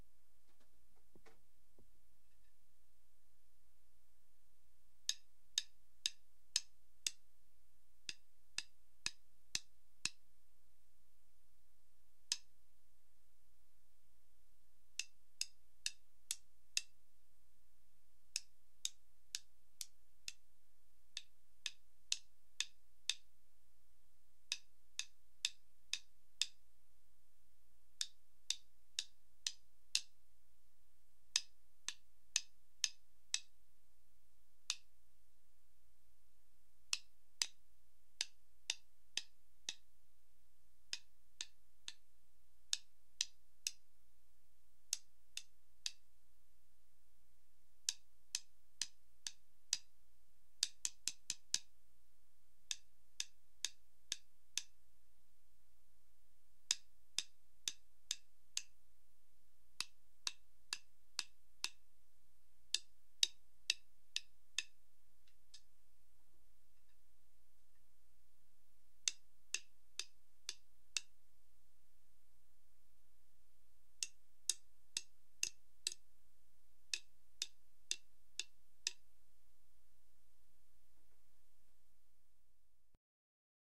drum sticks unprocessed 2
Various unprocessed hits from Zildjian drum sticks that came with Rock Band. Recorded through a Digitech RP 100.
domain, drum, drum-sticks, public, stick, sticks, unprocessed